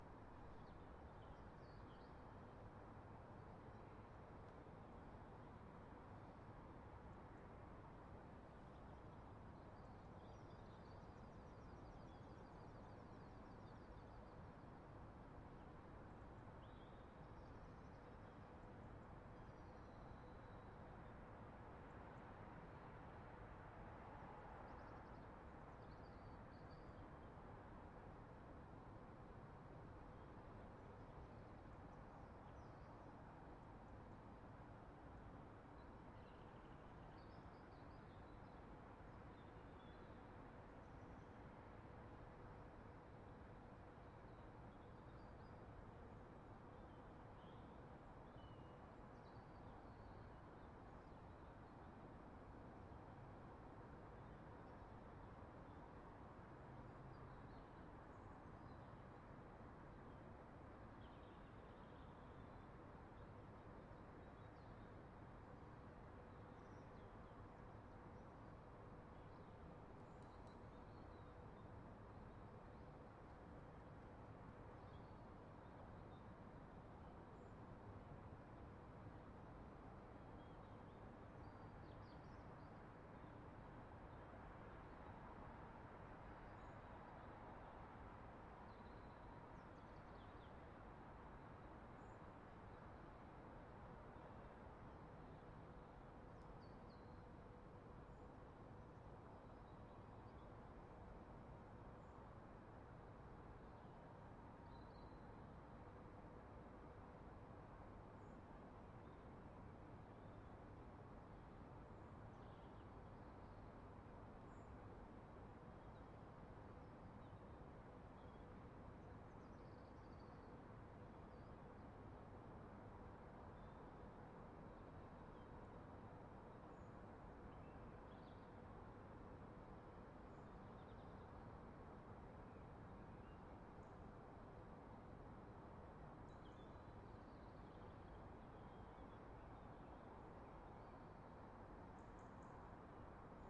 London UK Ambience Feb 2013 04
This is a stereo recording of city ambience in Greenwich, London, UK taken at around 4 in the morning. This recording is unedited, so it will need a bit of spit and polish before use.
suburban, suburbs, atmosphere, night, atmos, evening